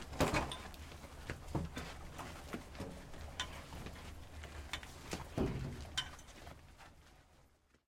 The sound of a gurney being pushed down a hallway
foley, gurney, SFX